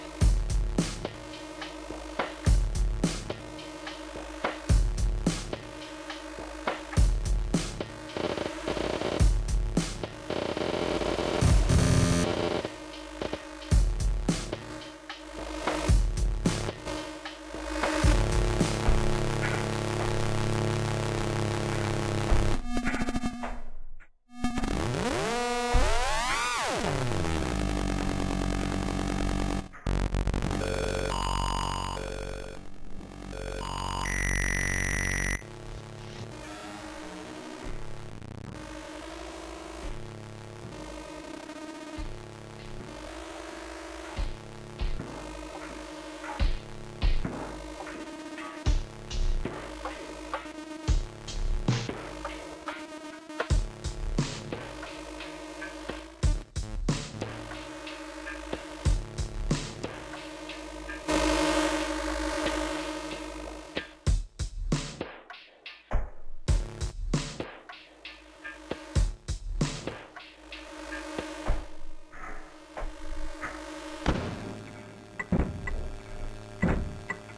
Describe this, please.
Noisy Max 4
Some lovely crunchy noise, made with Max
max, maxmsp, msp